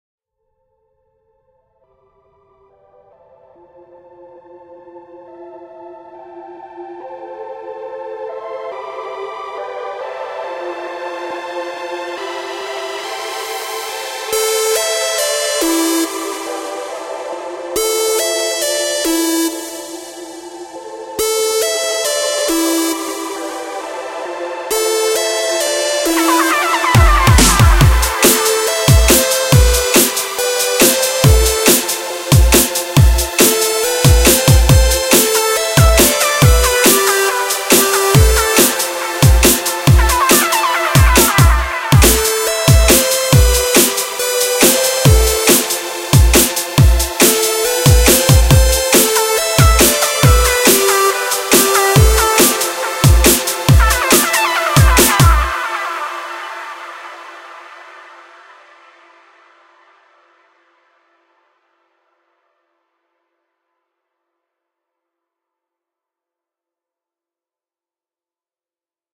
HV Loops (2014) - Britain
!SO HERE THEY ARE!
There is no theme set for genre's, just 1 minute or so for each loop, for you to do what ya like with :)
Thanks for all the emails from people using my loops. It honestly makes me the happiest guy to know people are using my sound for some cool vids. N1! :D
x=X
bass, break-beat, compression, electronic, eq, full-loop, fx, hard-lead, hats, house, kick, lead, limiter, loop, mastering, mix, pads, snare, synths